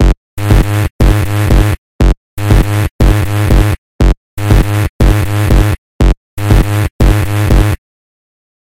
Electro Síncopa media
Ritmo métrica binaria de 4 pulsos y 4 compases. El sonido agudo marca la síncopa.
Síncopa --> 3
Binary metric rhythm of 4 pulses and 4 compasses. The high sound marks the syncopation.
Syncope --> 3